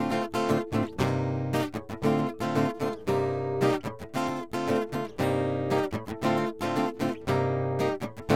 Electro-acoustic guitare loop in C dorian. 115bpm
It doesn't encompasses the effect of the soundboard.
You should apply (convolve) a guitar soundboard impulse response to this sound to make it sound more natural.